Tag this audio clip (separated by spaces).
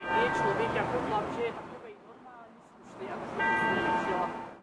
bells castle CZ Czech Panska prague